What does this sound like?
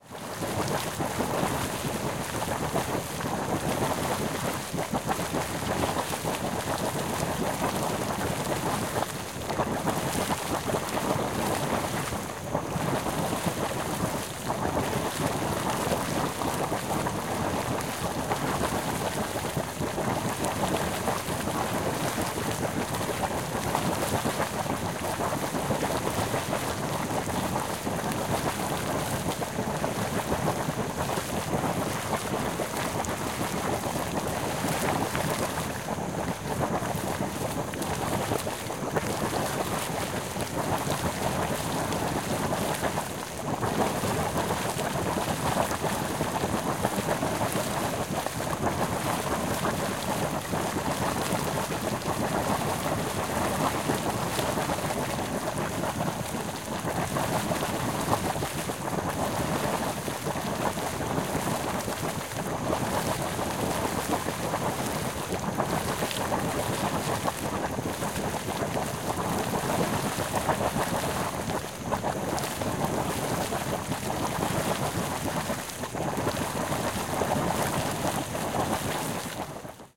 Field recording near a hot spring, Recorded with a Zoom H4n.
For more high quality sound effects and/or field-recordings, please contact us.